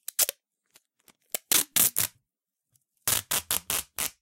Taking a little bit od adhesive tape